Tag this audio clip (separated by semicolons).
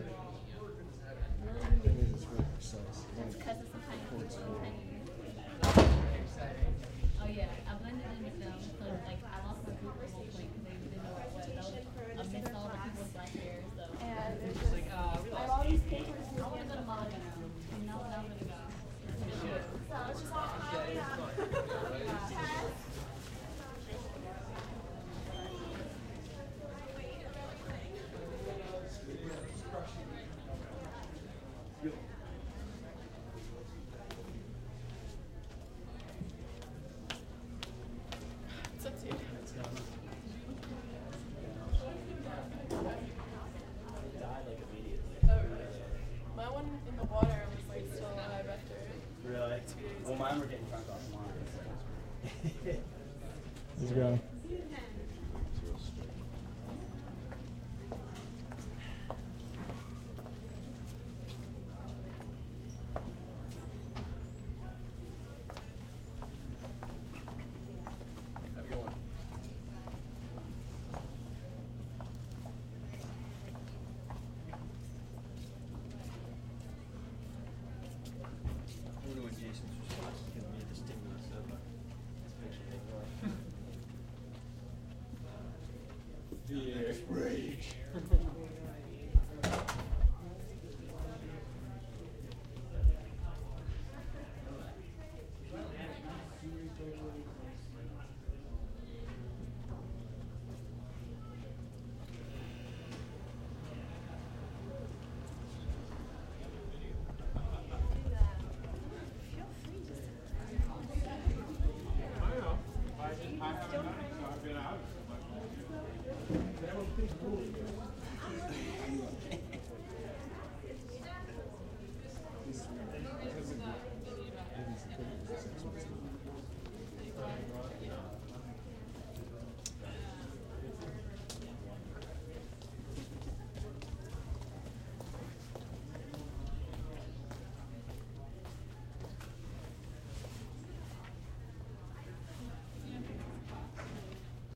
ambience; hall; people